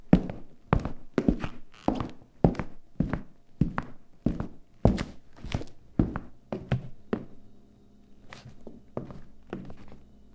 footsteps dress shoes 01
Walking around my apartment in a pair of old leather dress shoes I inherited from my grandfather. Recorded with Rode NTG-2 mic into Zoom H4 and edited with Spark XL.
feet, floor, foley, hardwood, shoes, steps, walk